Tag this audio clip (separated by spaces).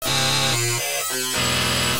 factory industrial machine machinery mechanical noise robot robotic weird